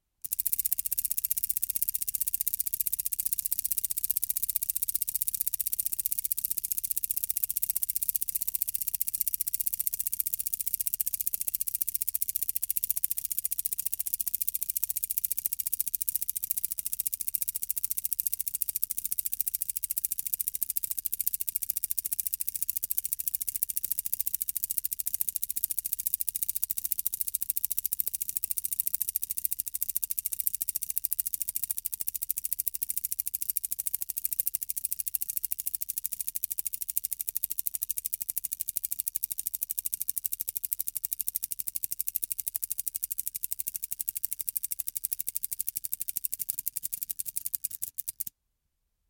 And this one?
A fast ticking sound made from some sort of clockwork mechanism running at full speed. Sounds almost similar to "Fast Ticking Slowing Down" (one of my other sounds) but a lot faster and not slowing down as much
clock, wind-up, clockwork, industrial, old, fast, ticking, time